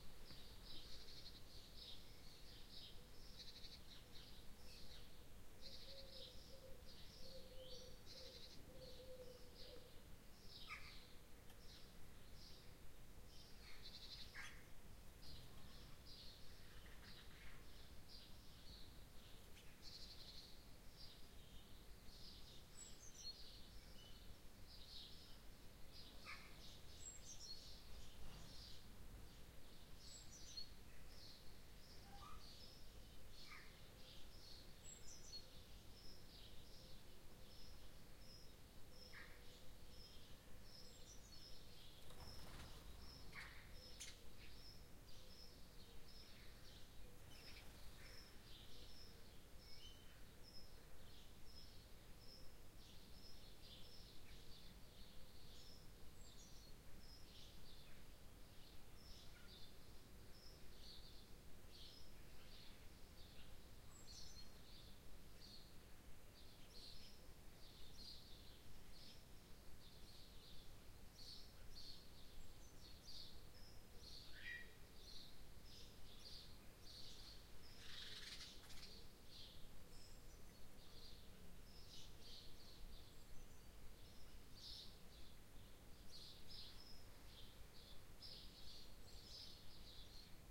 Birds in the morning
Field recording of bird sounds in early morning in residential area.
Recorded with Zoom H1 in Stiens, Netherlands